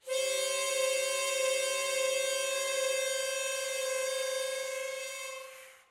Chromatic Harmonica 4
A chromatic harmonica recorded in mono with my AKG C214 on my stairs.
chromatic, harmonica